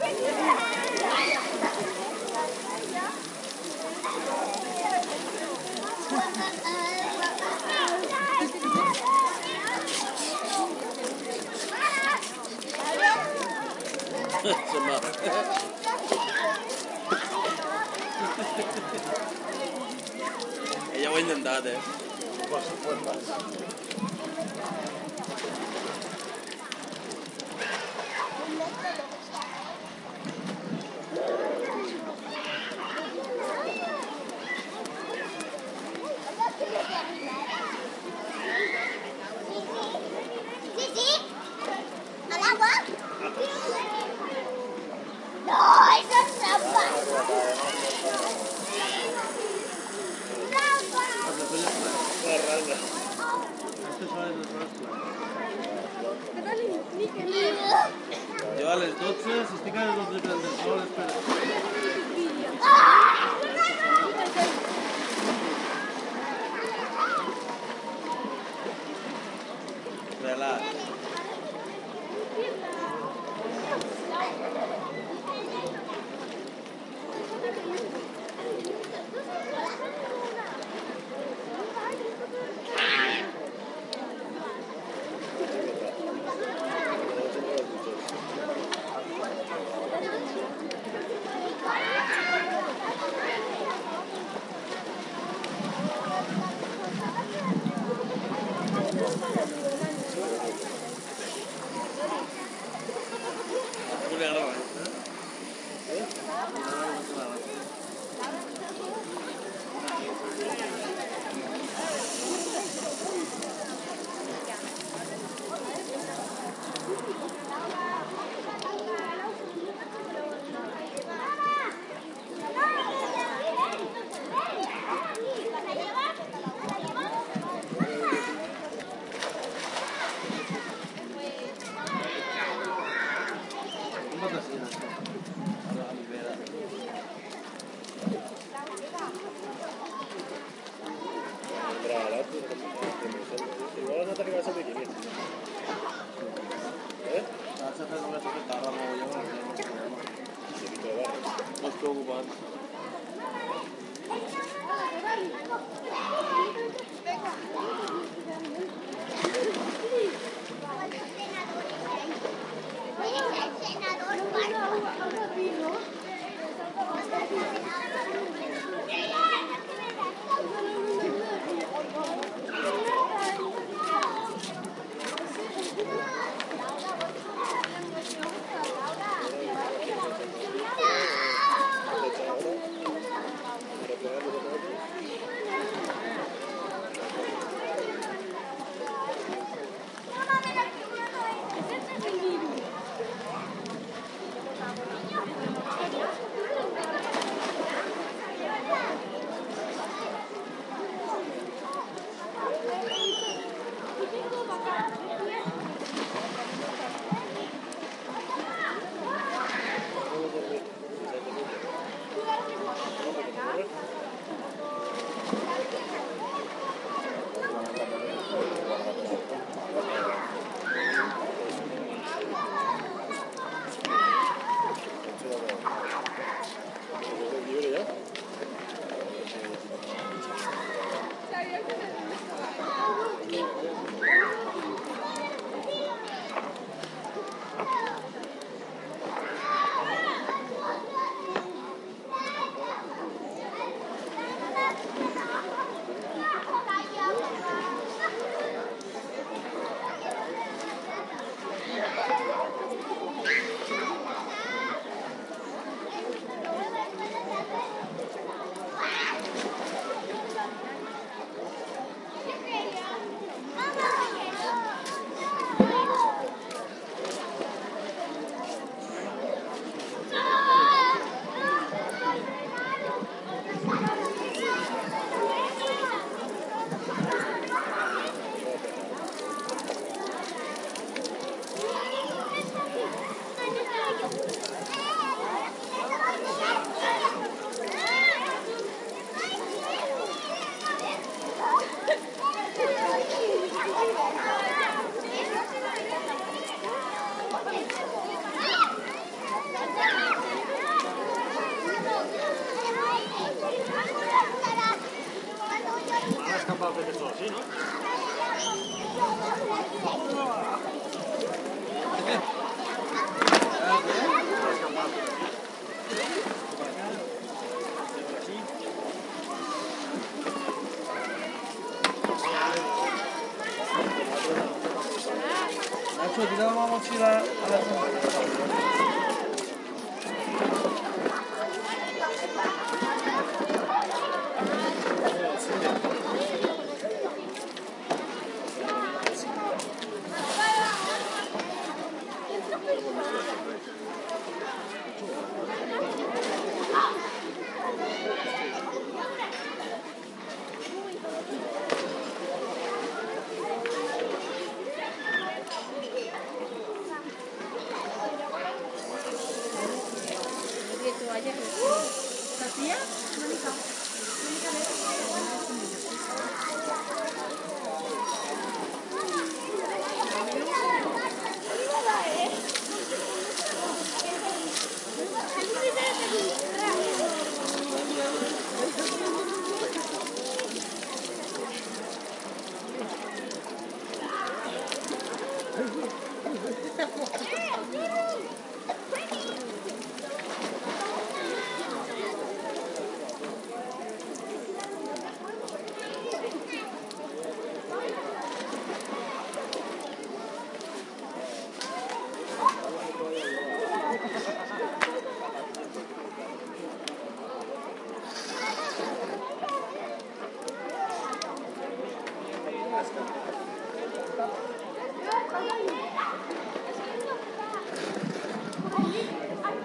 pool ambient kids playing splash shower summer
ambient, kids, playing, pool, shower, splash, summer